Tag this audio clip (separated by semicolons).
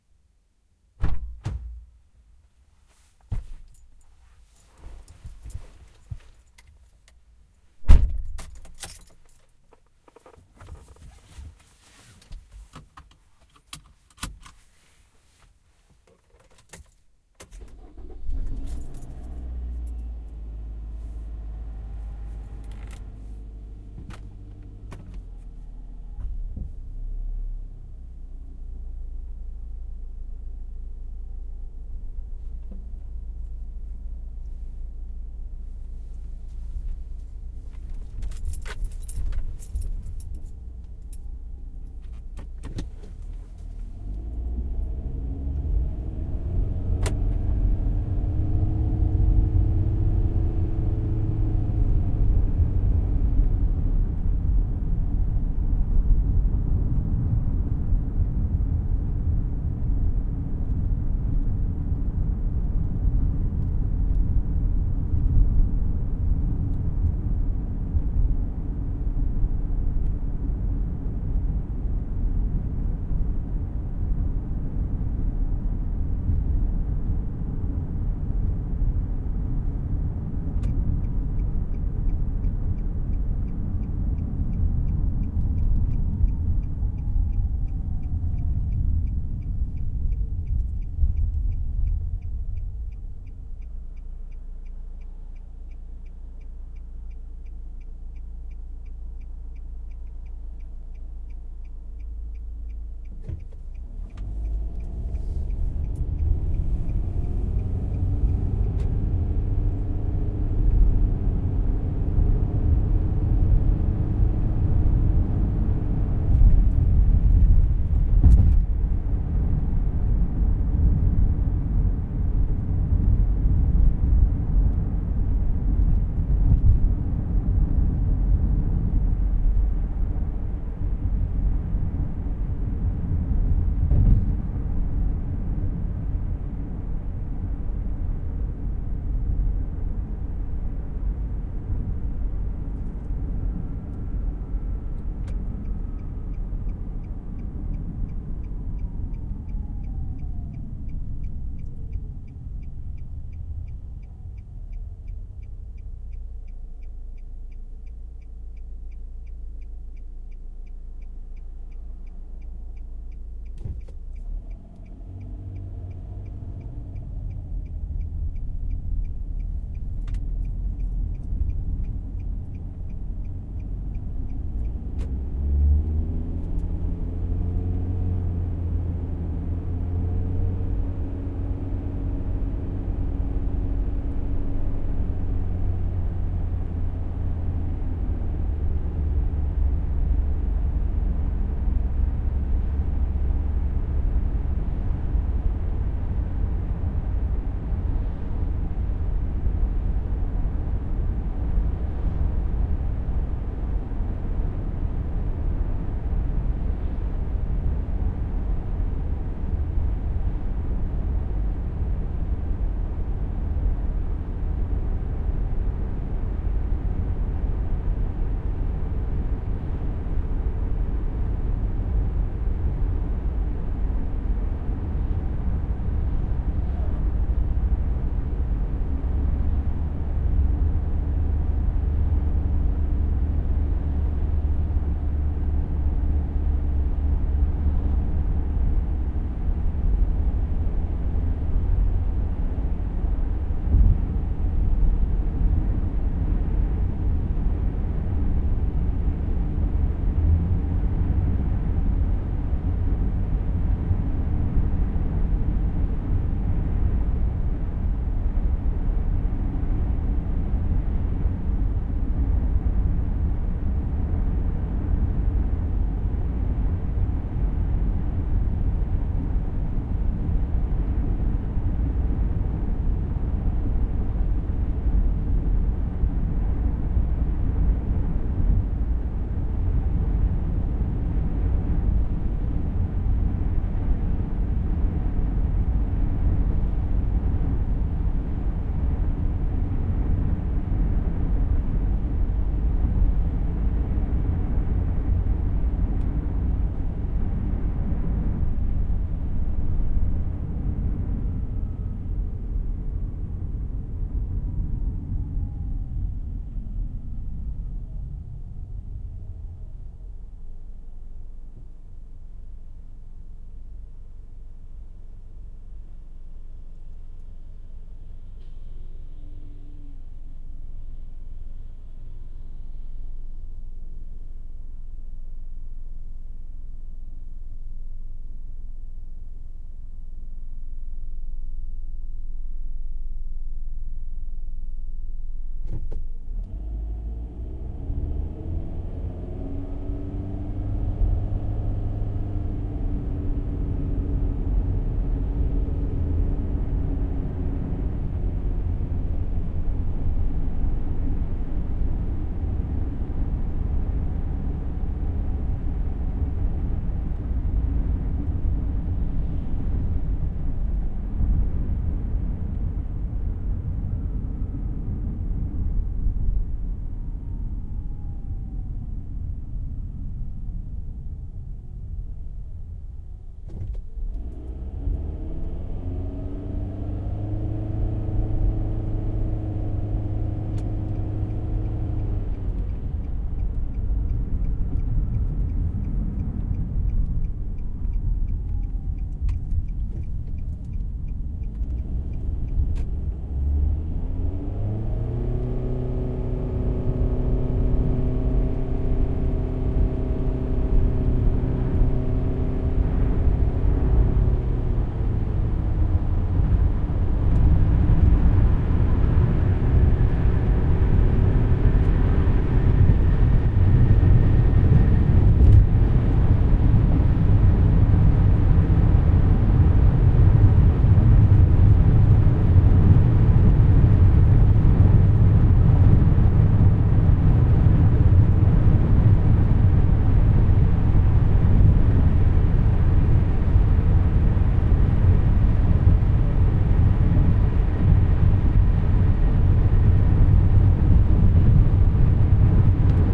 car; driving; field-recording; interior; Nissan; road; Rogue